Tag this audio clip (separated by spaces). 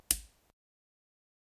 off switch